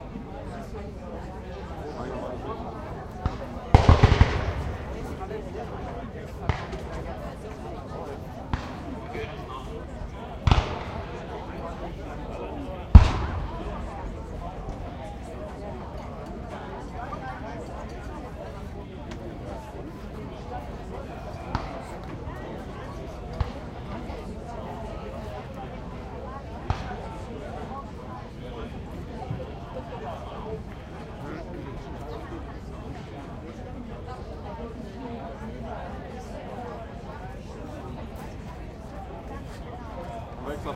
STE-014 minden beergarden battle behind
stereo field recording at minden near the weser riverside during the "250 years battle of minden" festival. sitting in a beergarden, the martial historical event staged in background. event recorded with zoom h2. no postproduction.
detonations, voices, canon, minden, field-recording, beergarden